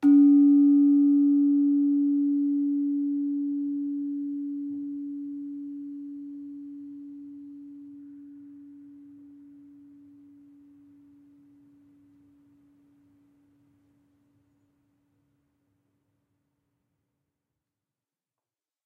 GENDER GBPL1h
CASA DA MÚSICA's VIRTUAL GAMELAN
The Casa da Música's Javanese Gamelan aggregates more than 250 sounds recorded from its various parts: Bonang, Gambang, Gender, Kenong, Saron, Kethuk, Kempyang, Gongs and Drums.
This virtual Gamelan is composed by three multi-instrument sections:
a) Instruments in Pelog scale
b) Instruments in Slendro scale
c) Gongs and Drums
Instruments in the Gamelan
The Casa da Música's Javanese Gamelan is composed by different instrument families:
1. Keys
GENDER (thin bronze bars) Penerus (small)
Barung (medium) Slenthem (big)
GAMBANG (wooden bars)
SARON (thick bronze bars) Peking (small)
Barung (medium) Demung (big)
2. Gongs
Laid Gongs BONANG
Penerus (small)
Barung (medium) KENONG
KETHUK KEMPYANG
Hanged Gongs AGENG
SUWUKAN KEMPUL
3. Drums
KENDHANG KETIPUNG (small)
KENDHANG CIBLON (medium)
KENDHANG GENDHING (big)
Tuning
The Casa da Música's Javanese Gamelan has two sets, one for each scale: Pelog and Slendro.